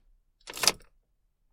Car key inserted into ignition
Simply car keys inserted into ignition station. Noise removed with Audacity